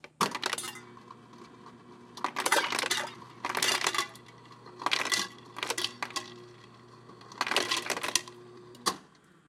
Refrigarator ice machine on metal cup
cold, refrigarator, freezer, kitchen, frozen, fridge, door, refrigerator, water, ice, metal, cup, crack